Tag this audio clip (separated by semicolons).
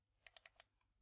logitech; mouse